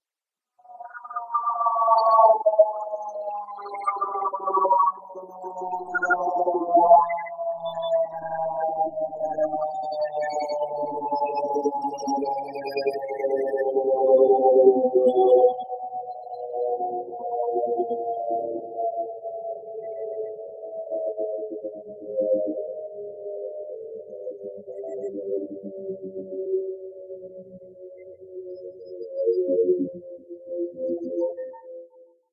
Void Ripples
effect, electric, gliding, guitar, outer, sci-fi, sea, space, special, stretch, under